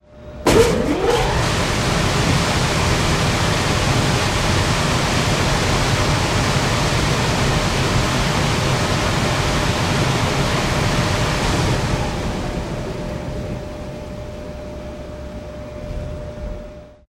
A large 3 phase blower motor starting up. It was recorded for a video on large air handlers. Recorded on BetaSP with a single Sennheiser short gun microphone.
fan, large-blower, power, electric-motor